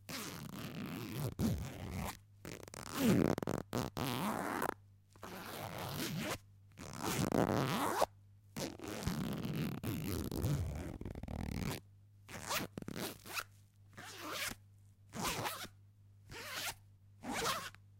Zipping and unzipping zipper. Long sounds.